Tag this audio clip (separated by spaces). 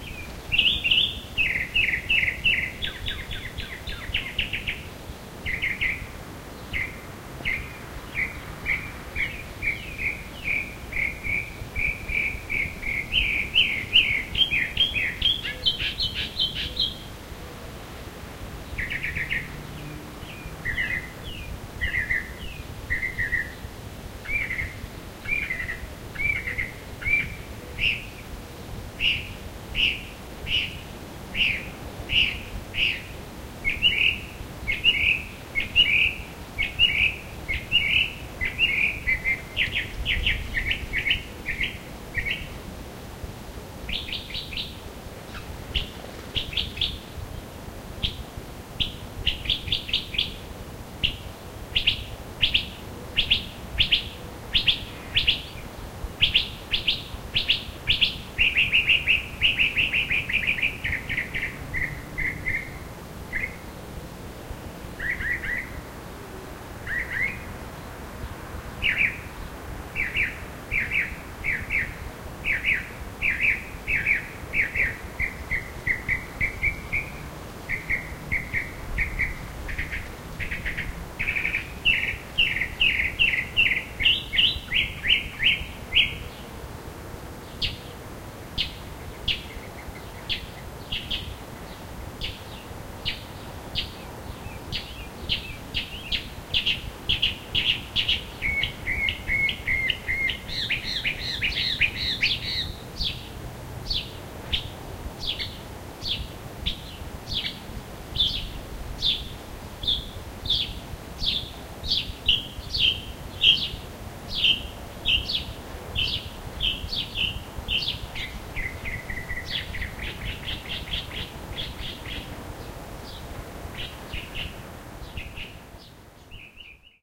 mockingbird recording field